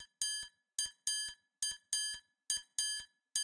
triangle-140-bpm-003
triangle, 140-bpm, loop